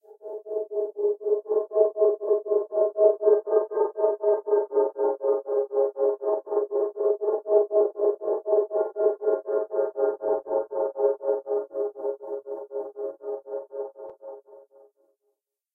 Patch #?? - I added some tromello to Organ B3. >> Part of a set of New Age synths, all made with AnologX Virtual Piano.
loop, new-age, sad, synth